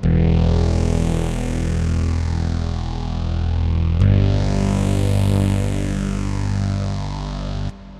UH Pad 001
Hard growling lead synth sound (NOT a pad as the title suggests). Tweaked from the Zebra synth.
hard, synth, electronica, lead